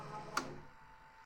13 CUE STOP
Recording of a Panasonic NV-J30HQ VCR.